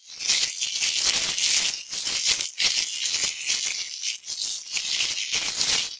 delphis FOLIE 2
crisp, crunch, fx, paper